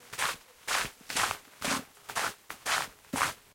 Footsteps Dirt Road 1
Footsteps in dirt.